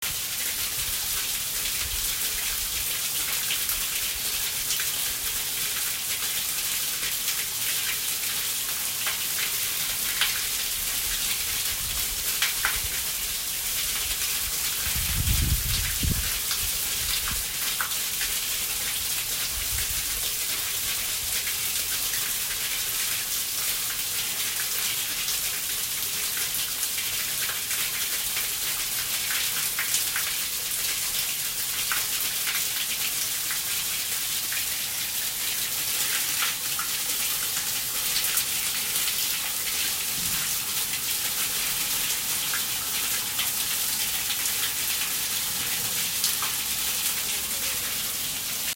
water rushing through a street drain after a big storm